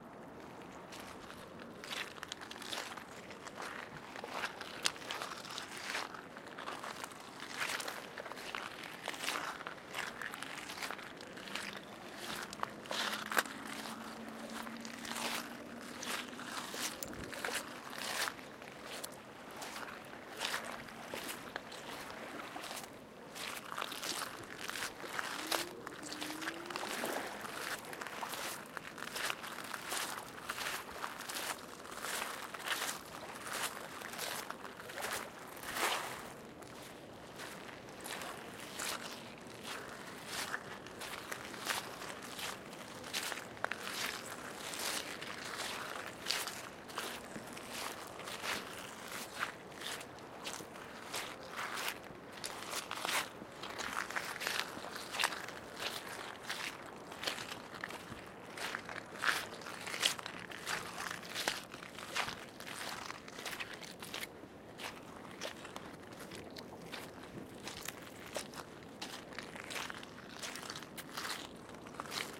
Footsteps / walking on stones at the beach
beach, feet, footsteps, river, stones, stony, walk, walking, wet